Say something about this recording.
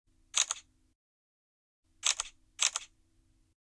FX Camera Phone 01
A camera sound for a cellphone.
camera, fx, phone, picture, snap